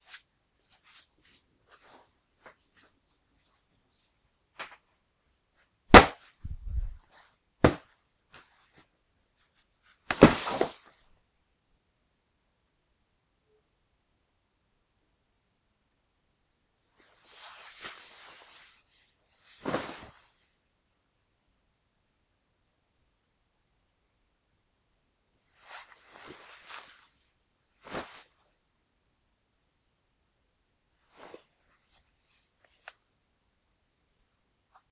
Fall on the floor (v. cushion)
Falls on the floor but with a cushion effect.
floor, cushion, falling